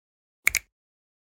finger-snap-stereo-08-triple
10.24.16: A natural-sounding stereo composition a snap with two hands. Part of my 'snaps' pack.
bone, bones, break, clean, click, crack, crunch, finger, fingers, fingersnap, hand, hands, natural, percussion, pop, snap, snapping, snaps, whip